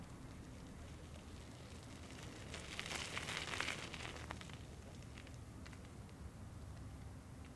Bike Tire on Cement